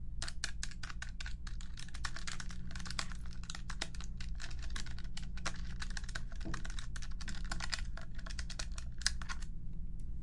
calculator fingertips
fingertips, calculator, office